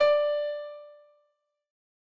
120 Concerta piano 05
layer of piano
loop, layer